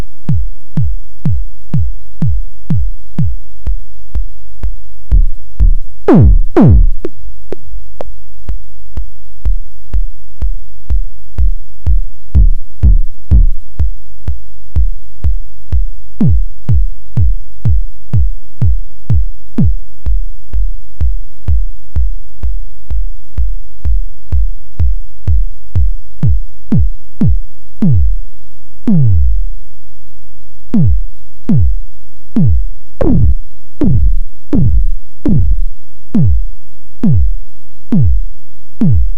Monotron Drums
Drum made by a Monotron.